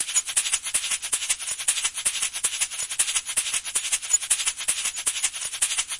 This is me playing an egg shaker. It will loop perfectly at 80 bpm or 160 bpm. Basic cleanup in Audacity (noise removal, low pass and high pass filters).